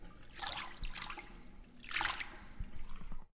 Agua Movimiento 1
Short sound generated from the movement of water with a hand
movement, water